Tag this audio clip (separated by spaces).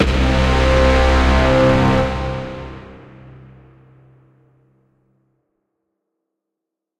orchestral arrival braaam scifi brass heroic movie battle tension inception cinematic hit suspense soundtrack hollywood rap mysterious trailer strings film epic fanfare dramatic